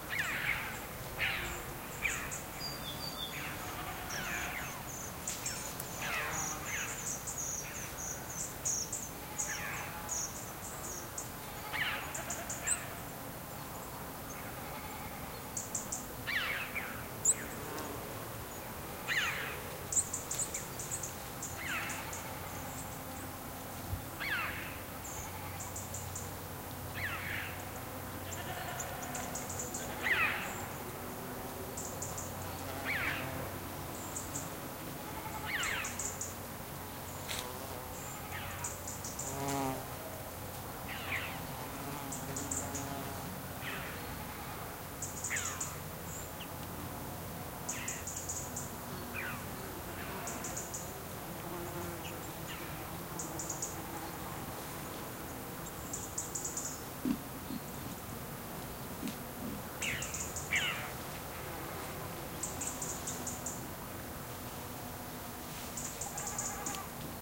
Red-billed Chough (Pyrrhocorax pyrrhocorax) calling, other birds (Great Tit), bleating goats and buzzing insects in background. Sennheiser MKH60 + MKH30 into Shure FP24 preamp, Olympus LS10 recorder. Recorded at the Sierra de Grazalema, Cadiz (S Spain) near a place known as 'Salto del Cabrero'
mountain, ambiance, bleating, goat, nature, field-recording, chough, insects